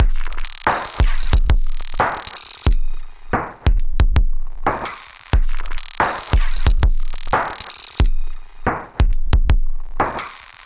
lo-fi idm 2
beat distrutti e riassemblati , degradazioni lo-fi - destroyed and reassembled beats, lo-fi degradations